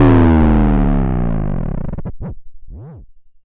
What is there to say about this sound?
Vermona DRUM 11
From the Drum 1 Channel of the Vermona DRM 1 Analog Drum Synthesizer
1, Analog, DRM, Sample, Vermona